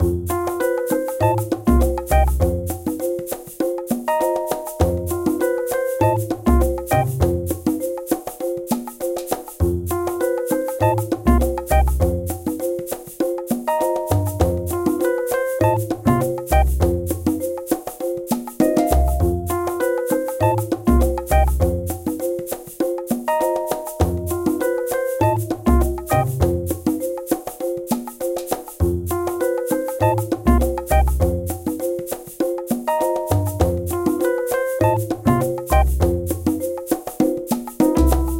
The bunnies are enjoying the sunny day. But they need to be very quick, when they spot the fox!